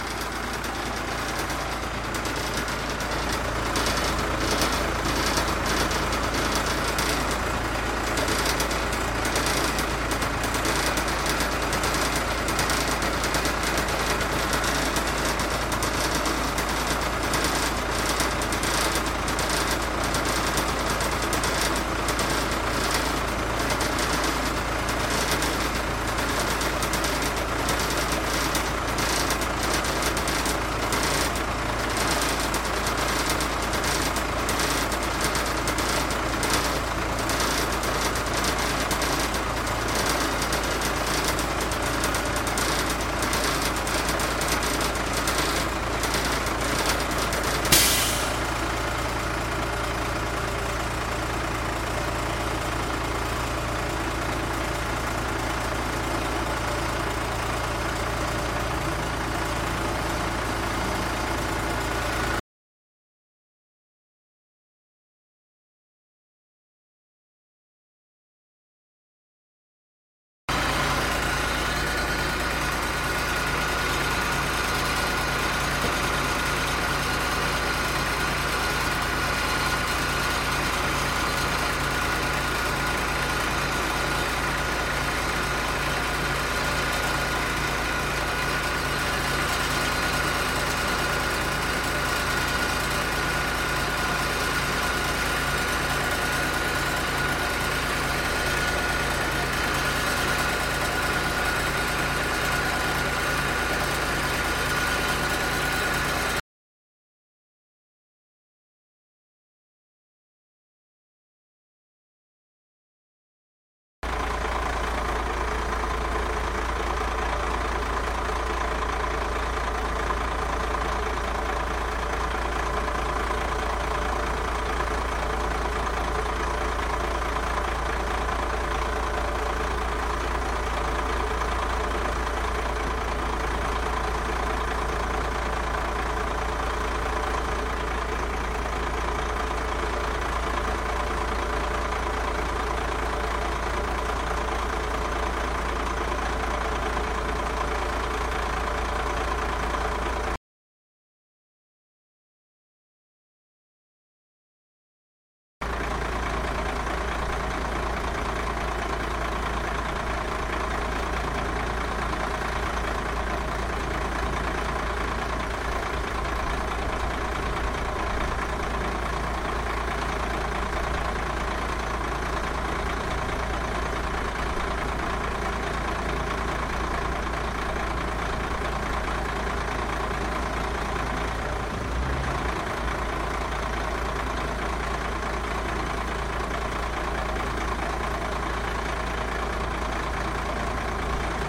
school bus old rattly idle various diesel truck semi dump
bus, old